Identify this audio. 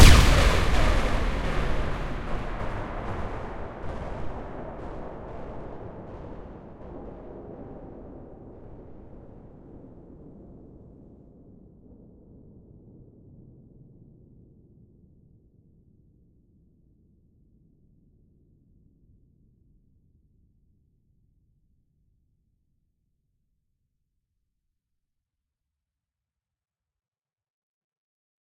A totally synthetic explosion sound that could be the firing of a large gun instead of a bomb exploding. The reverberant tail is relatively long, as though the explosion occurs in a hilly area. But you can reshape the envelope to your liking, as well as adding whatever debris noise is appropriate for your application. Like the others in this series, this sound is totally synthetic, created within Cool Edit Pro (the ancestor of modern-day Adobe Audition).
gun, good, blast, bomb, synthetic